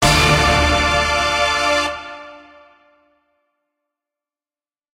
Just a dramatic stinger sound. Made mostly with the Megalovania soundfont lol.